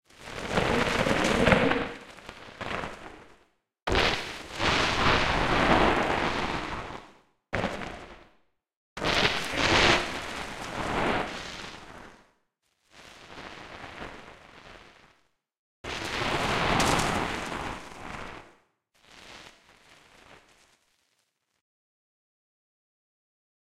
A large and otherworldly sound, processed and manipulated.
processed, large, otherworldly, manipulated